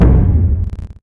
Drum kick electronically treated.
kick, drum, beat